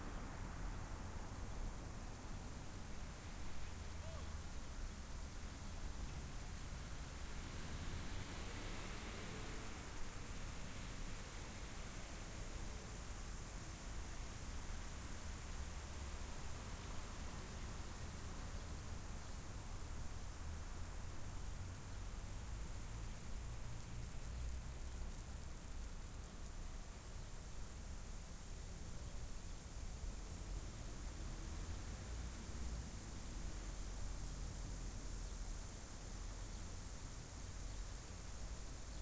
Porto, Portugal, 19th July 2009, 6:30: Steady lawn Sprinkler between the Douro river and a road. In the middle of the sound the water hitting the concrete sidewalk can be distinctly heard. Car traffic passing by.
Recorded with a Zoom H4 and a Rode NT4